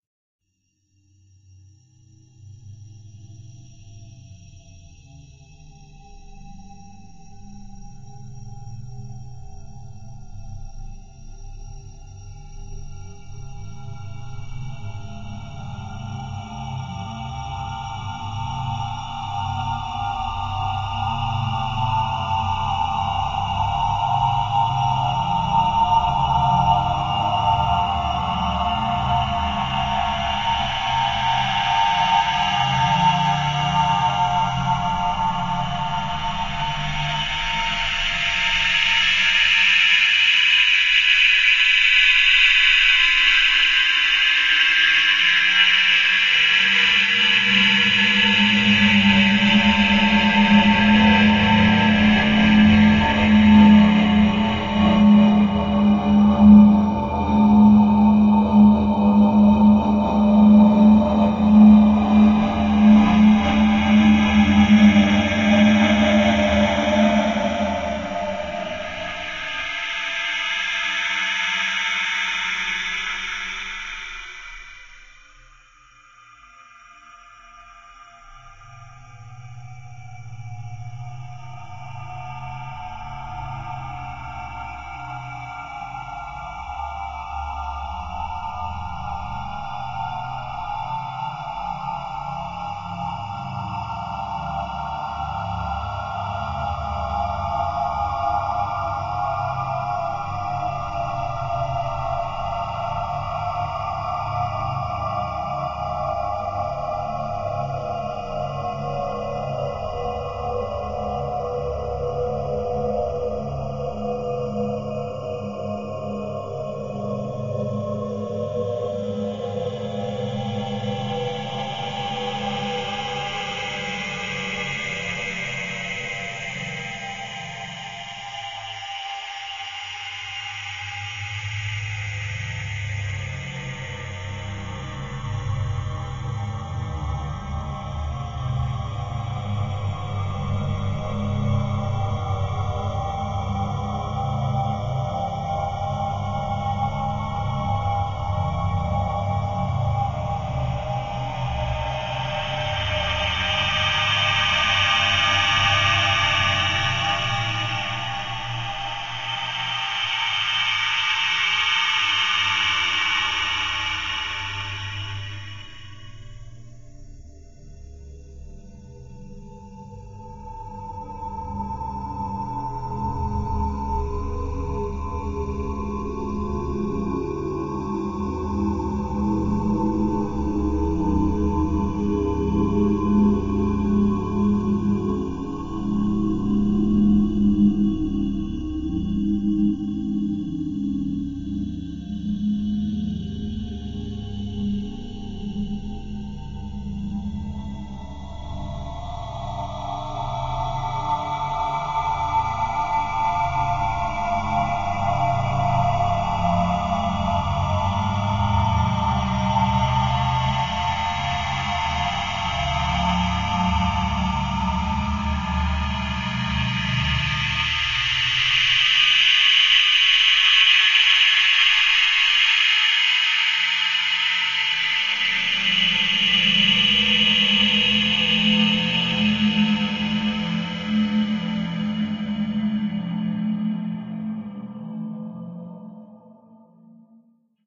Alien Sound 3
I created these using just my voice recorded with my laptop mic and wavpad sound editor. I needed some alien type sounds for a recent project so I created these. Enjoy!
Alien-Power-Surge, Alien-Beam-Transport, Alien-Hyperdimensional-Drive